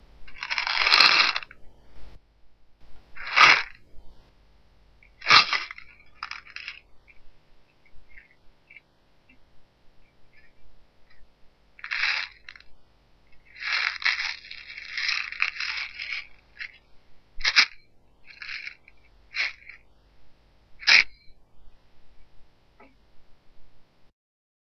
Shook some vitamins and recorded it. Due to my crap microphone I boosted the bass and the 10kHz, and also removed the hum.
Enjoy :)
bottle, foley, pills, shake, tablets, vitamins